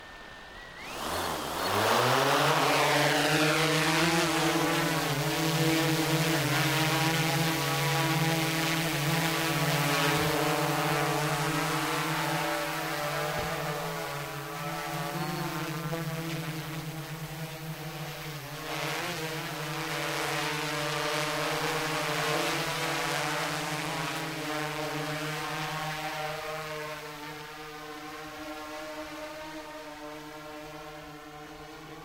15Y08M20-Drone Take Off 01
A quadcopter-style drone takes off, and hovers at a distance.
Swarm, Drone, Bee, Propelllers